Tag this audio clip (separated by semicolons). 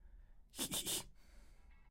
evil; laugh; laughter; mad